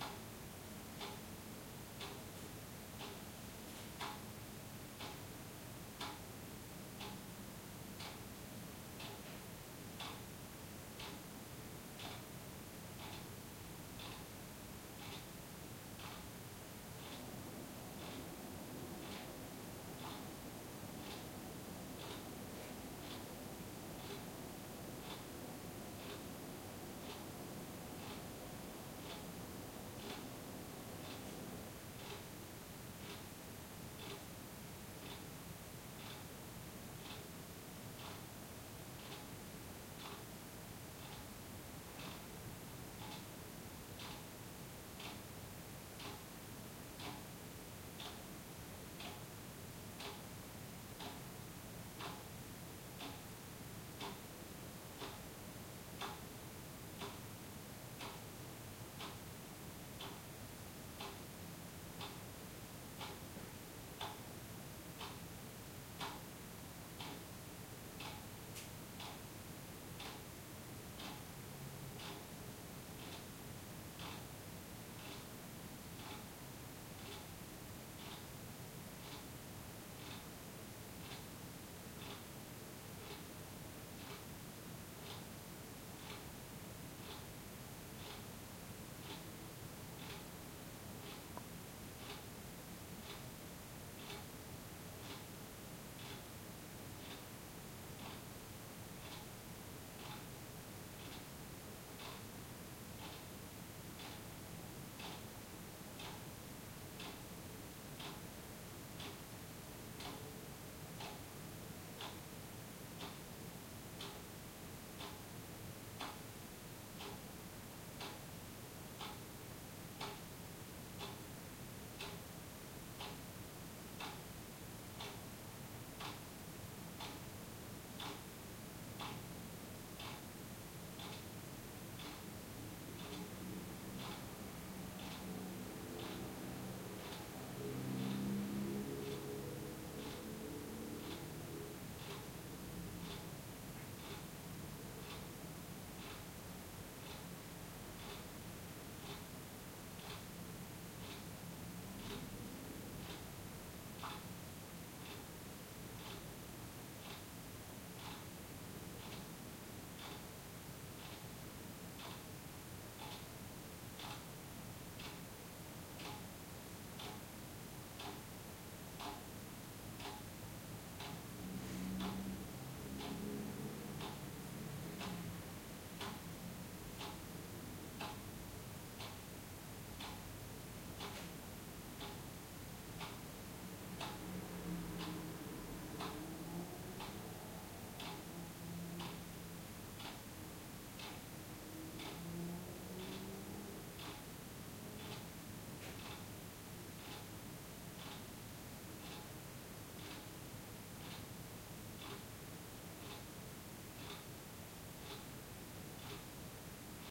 170720 SmallAppartment Kitchen R
4ch-surround field recording of a kitchen in a medium sized urban North European apartment building at night. A clock is ticking in the rear of the recorder position, small noises by drains and kitchen appliances can be heard over the backdrop of soft city noises coming from the open window in front. Some sporadic traffic can also be heard.
Recorded with a Zoom H2N. These are the REAR channels of a 4ch surround recording. Mics set to 120° dispersion.
ambience, ambient, atmo, backdrop, city, clock, field-recording, kitchen, neutral, night, quiet, room, rooms, surround, tick, trafic, urban